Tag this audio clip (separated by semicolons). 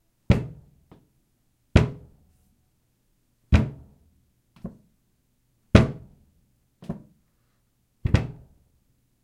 bathroom; bone; elbow; hand; hit; impact; knee; porcelain; sink; tile